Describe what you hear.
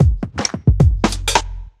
This is a Burial-like dubstep loop.